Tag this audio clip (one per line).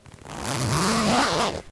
camp
camping
coat
jacket
off
pants
peel
peeled
peeled-off
peel-off
slow
slowly
stripping
sweater
tent
to-peel-off
to-strip
undress
undressing
unzip
zip
zip-down
zipped
zipper
zips
zip-up